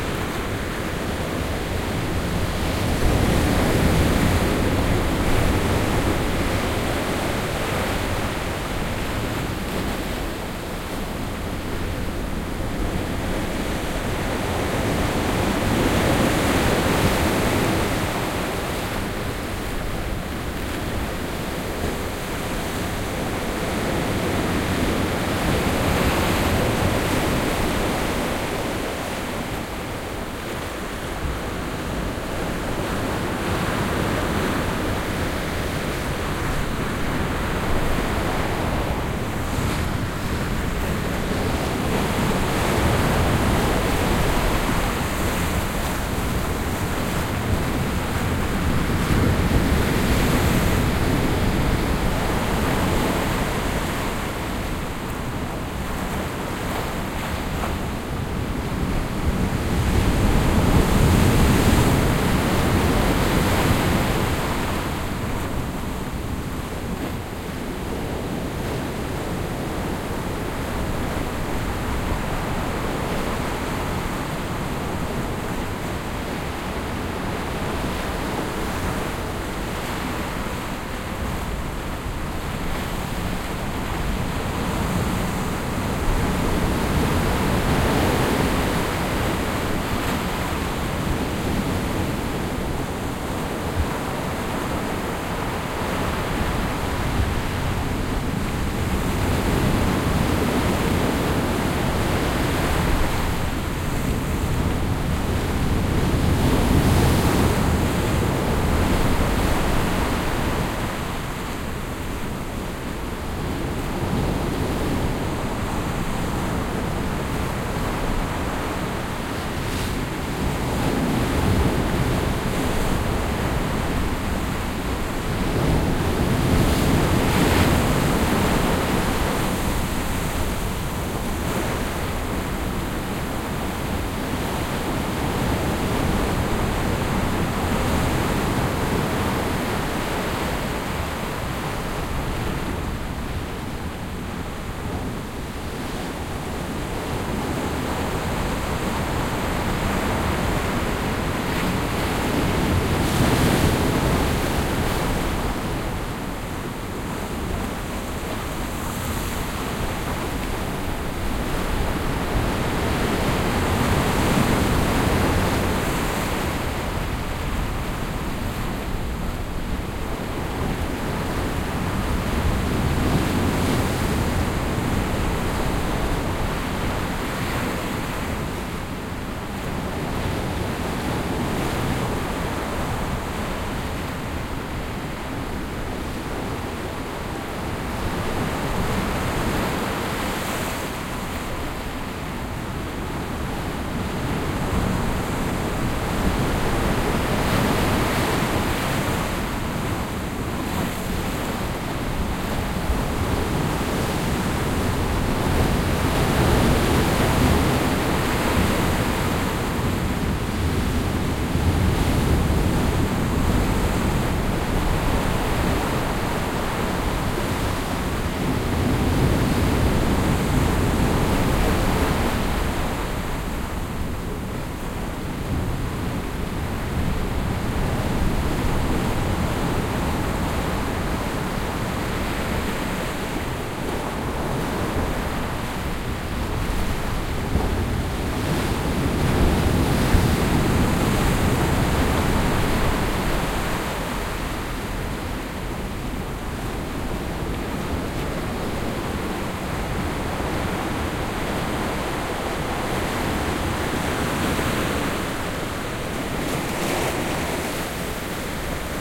porto 19-05-14 quiet to moderate waves on rock beach
Quiet day, close recording of the breaking waves on the rocks
breaking, waves